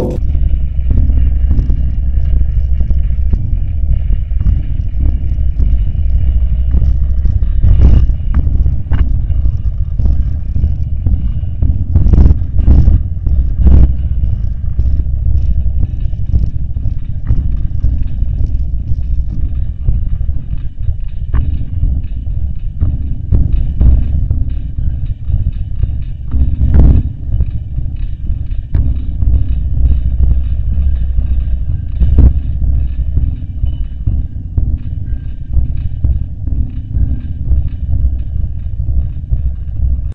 The sound of a big stone crusher. A electric engine is rotating a enormous wheel that got a weight of many tons. The wheel needs minutes to reach maximum. Now,the wheel needs minutes to get to maximum. The energy in the machine is transferred to a couple of gigantic iron blocks, which make a move that reminds of our mouth when we chew. Stone blocks that have a weight of tons come sporadically from various places in order to buy sand and gravel of different size. The noise is not bearable. The machine got a name 'Thick Berta' after a mega cannon in the first world war. m..,
cliff, crusher, machine, stone